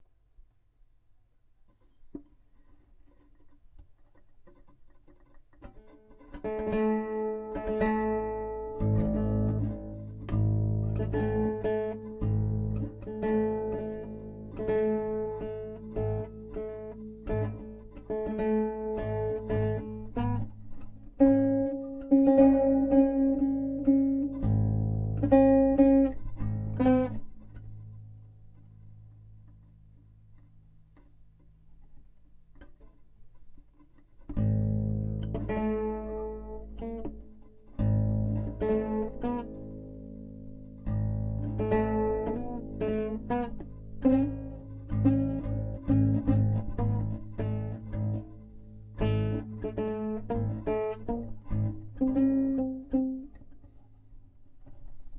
I have always admired gutarists like Segovia, Eric Clapton, Mike Oldsfield, Woodie Guthrie, BB King to mention some. Myself I play nothing, didn't have the luck to grow up in a musician family. So I have whole mylife seen myself an idiot who can never learn an instrument. Had a visit recently, a friend of my daughter. He found an old, stringless guitar among a lot of stored stuff in our cellar. He had just bought a set of strings for his guitar, but he mounted them on our guitar. He was playing for an hour and I said how I envy him. Why, he answered, here, sit down and play. I put THe instrument aside and told him I was too stupid. Well, maybe, he replied, but most of us guitarists are idiots. To my surprise I found the strings, but had certain problem to press hard enough to get a clear tone. I tried for a couple of hours and recorded the fumbling and rattling. Next day I tried again and recoded and I spent an hour for five days. You can follow file novasound330a to 330e.